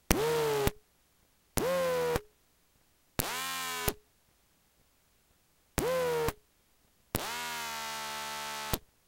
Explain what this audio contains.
Phone transducer suction cup thing on various places on a remote control boat, motors, radio receiver, battery, etc.
transducer, magnetic, hum, electro, buzz, electricity